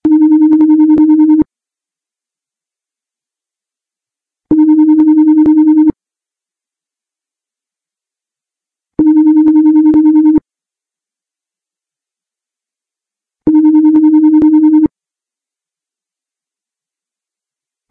Example of a telephone ringing tone as used in Japan, namely 300hz and 330hz tones in a 1.5, 4.0 sequence, repeated.
ringing, Japan, telephone, ring, calling, 300hz, 330hz, phone, tone